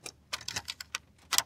Door Lock01
Door lock unlocking